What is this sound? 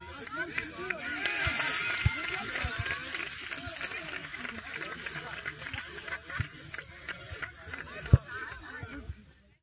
The third of four goals, recorded at a soccer / football game in Outrup, Denmark. Played by younglings from age 6 - 7.
This was recorded with a TSM PR1 portable digital recorder, with external stereo microphones. Edited in Audacity 1.3.5-beta on ubuntu 8.04.2 linux.